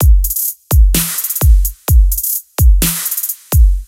Trap loop 1
I made this traploop for my remix of Truckers Hitch by Ylvis, and i loved it so much i wanted to share it with you :) I used the included samples from FL Studio 11 where i also put togheter the loop and mixing preference.
128bpm, loop, music, rnb, Trap, trap-loop